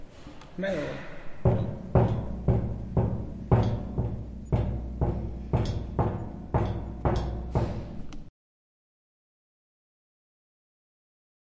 The sound of steady knocking on a sheet of metal. It was recorded with Zoom H4n's stereo microphone.
metal, knocking, banging